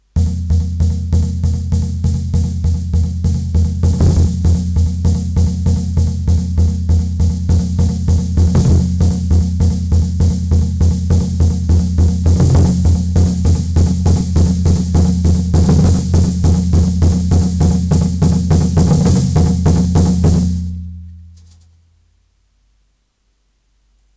drum fragments music composition toolbox